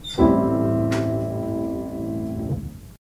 Piano Chord a
Some snippets played while ago on old grand piano
piano, grandpiano, chord, minor